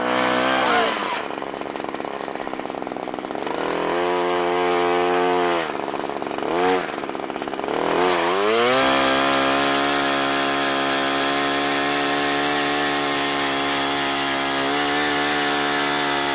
ice drill motor chainsaw drilling nearby